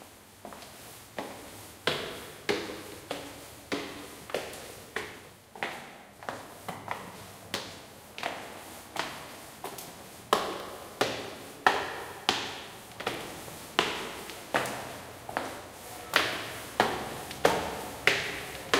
Japan Matsudo Hotel Stairwell Footsteps
Footsteps in a stairwell in Matsudo, Chiba, Japan.
Recorded with Zoom H2n in MS-Stereo.
reverb, steps